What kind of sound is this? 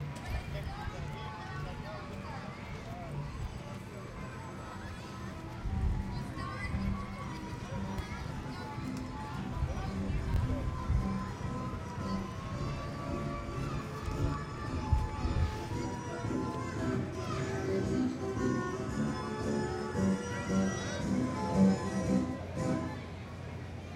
VA State Fair # 3 (Merry-Go-Round Music Climax)
Approaching merry-go-round as its music climaxes. I like this one.